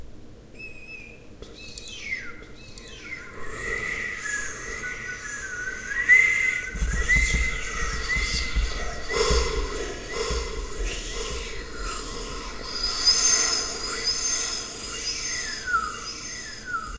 scary wind
This is a scary sound of wind
creepy, drama, dramatic, phantom, scary, sound, spooky, thrill, wind